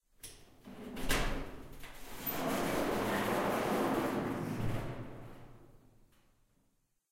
Opening a garage door